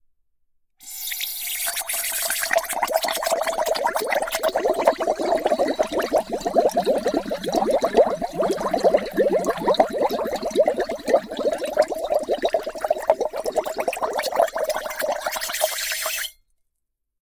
Bubbles Descend & Ascend
Long sequence using air can in glass of water descending into glass of water and also coming back up again